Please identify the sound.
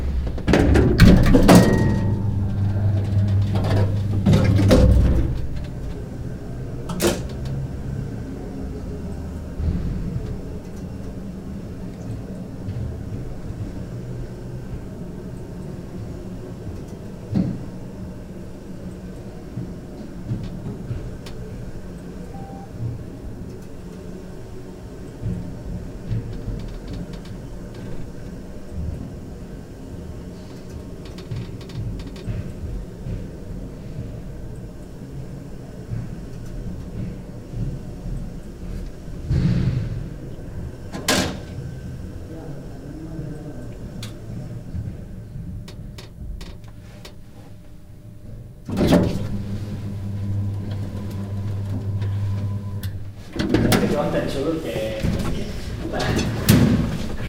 in an elevator with occasional neighbour neighbourhood noises and jabbering COMPRESSED
neighbourhood, jabbering, noises, neighbour, elevator